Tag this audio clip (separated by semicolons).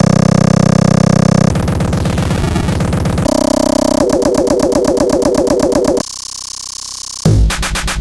droid; artificial; error; cyborg; machine; game; drum; command; art; system; space; rgb; experiment; robotic; console; spaceship; bit; virus; robot; computer; failure; android; Glitch; databending